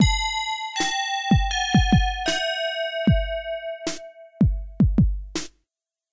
GARAGEBAND LOOP 002
Loops from clippings of songs I made in GarageBand. This one is slow and has bells with a beat.